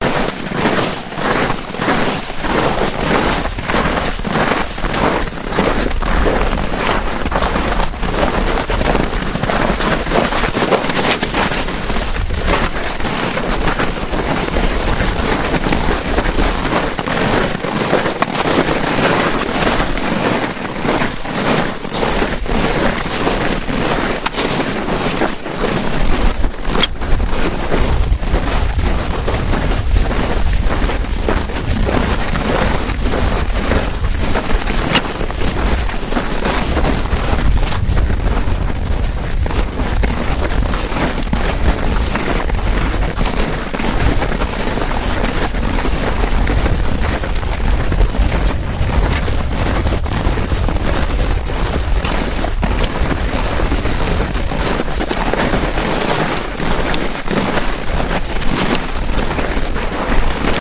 persone che camminano sulla neve
People walking on fresh snow